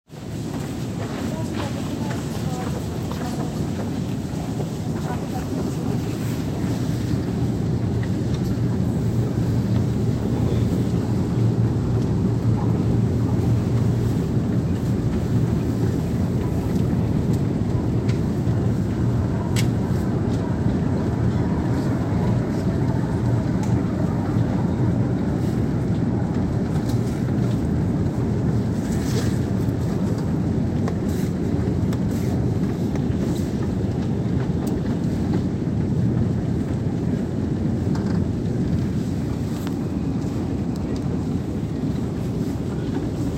Escalator noise - I was surprised by the sentiment of sound pressure the ensemble was generating.